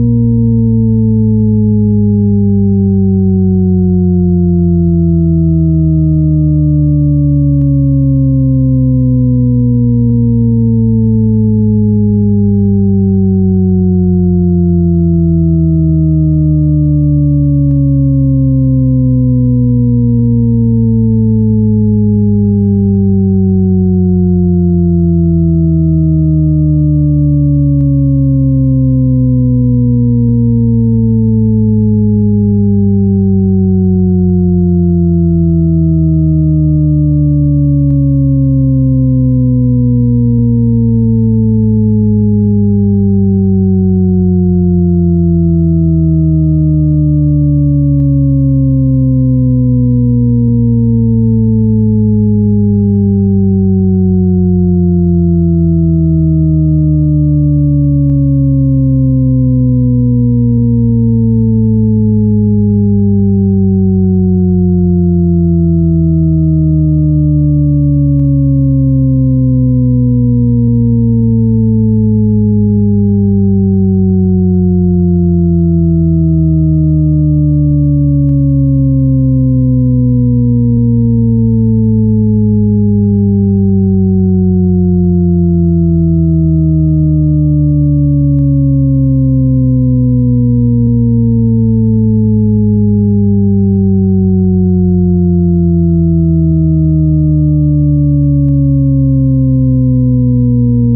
continuous glide JC Risset
Sound originally created by Jean-Claude Risset to create an auditory illusion of an endless glissendo from high to low.